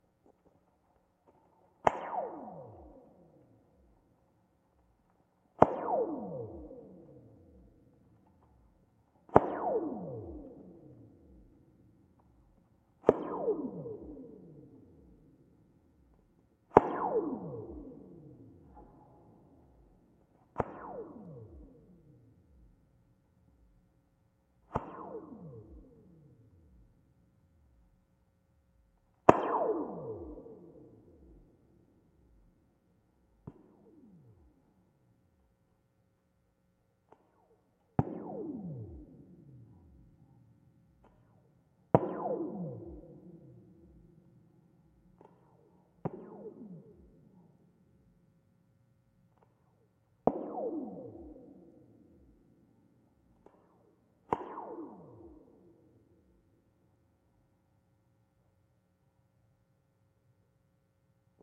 Contact mic recording of radio tower support cables (former DeKalb, Illinois, USA station WNIU). Characteristic "ray gun" sound when wire is struck. This is the longest of five cables in this group.